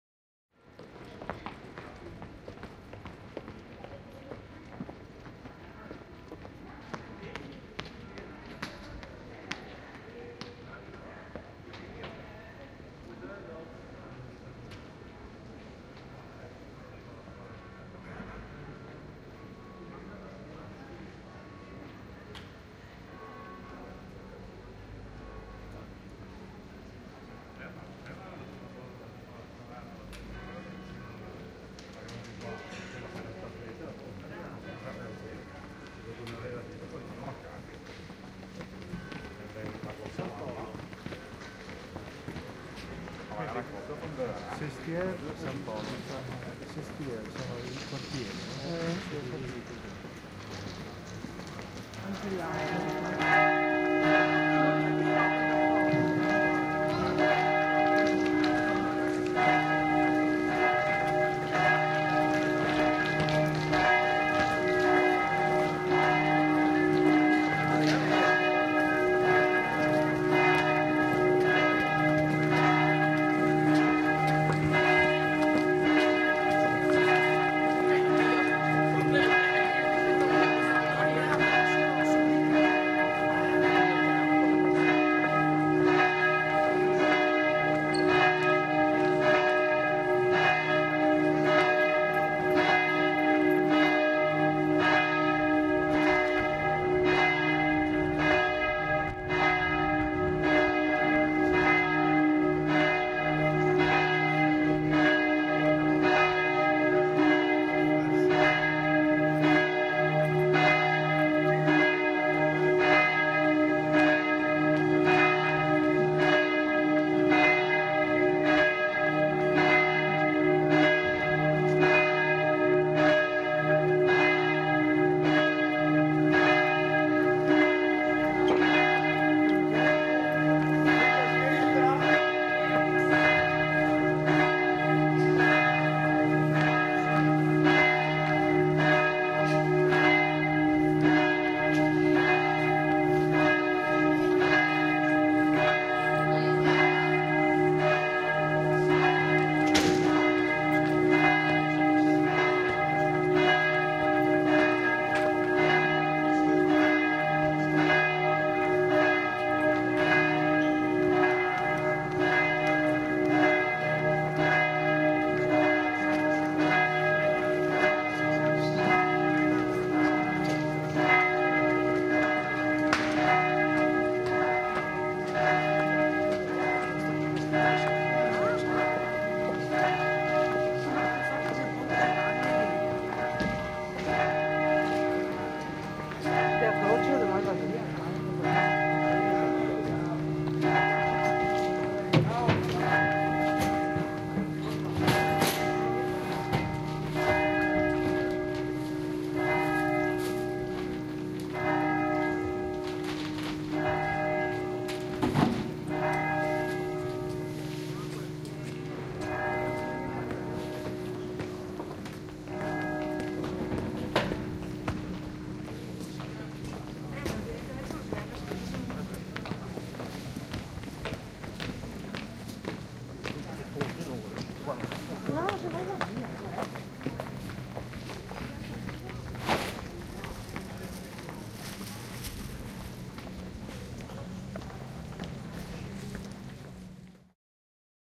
Binaural field-recording in Venice, Italy. Distant churchbells are ringing, while standing on a small bridge. People are passing by and then a church bell close by starts to ring also (too bad there's a little wind halfway during these ringing church bells).
These church bells are especially ringing for the 55th birthday of my mother (and also because it's 12:00 o'clock)! Congratulations! 03-03-2008